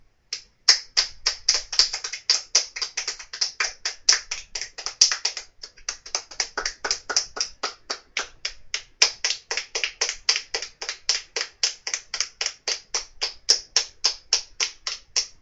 Two people clapping in my microphone. 15 seconds of a >1 min. recording of two people clapping. Recorded with a CA desktop microphone.
claps, clap, polite, applause, two, people